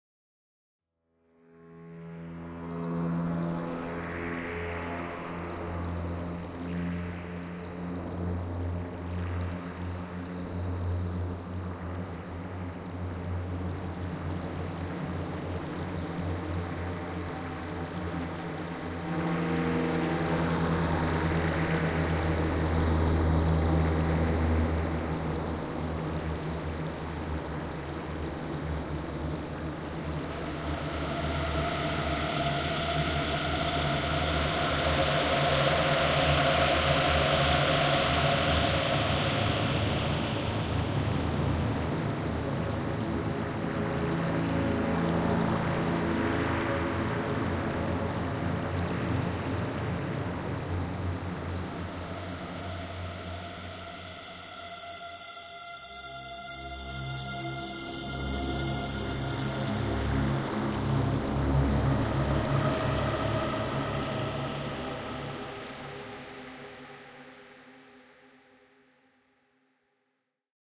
archi soundscape doom3
Created using KarmaFX Synth Modular into a DaevlMakr plugin and Arts Acoustic Reverb.
evil, drone, ambiant, ambience, ambient, synthesized